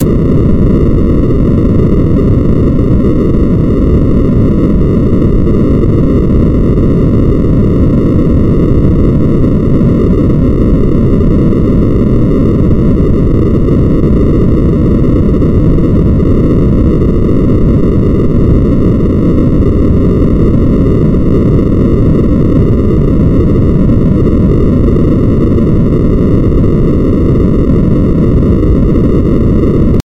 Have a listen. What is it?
13 LFNoise1 800Hz
This kind of noise generates linearly interpolated random values at a certain frequency. In this example the frequency is 800Hz.The algorithm for this noise was created two years ago by myself in C++, as an imitation of noise generators in SuperCollider 2.